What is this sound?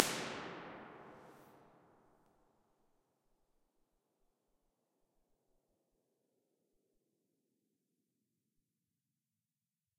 Finnvox Impulses - EMT 3,5 sec
impulse, response, reverb, ir, Finnvox, studios, convolution